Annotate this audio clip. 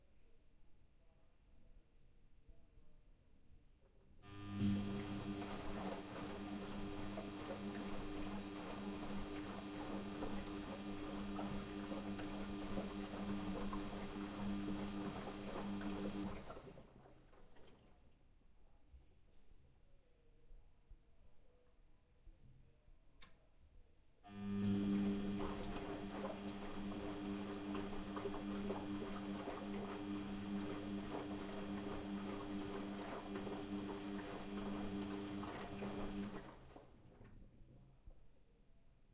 washing machine close
two cycles of washing in close distance